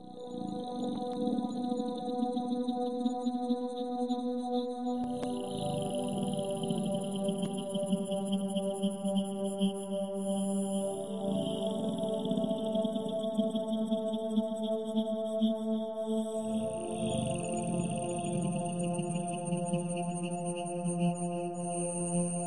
Abs pad
i've made this pad with some tuned sounds from the softsynth absynth.